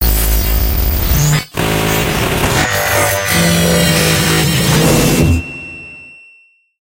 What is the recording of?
Electric Shock 4
Sounds developed in a mix of other effects, such as electric shocks, scratching metal, motors, radio and TV interference and even the famous beetle inside a glass cup.
Futuristic, Machines, Sci-fi, Electronic, Mechanical